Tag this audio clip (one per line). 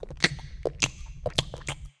vocal
Suck
baby
sucking
pacifier